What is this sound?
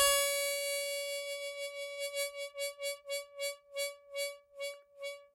Harmonica recorded in mono with my AKG C214 on my stair case for that oakey timbre.